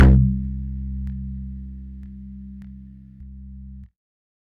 Sample05 (acid-B- 6)
Acid one-shot created by remixing the sounds of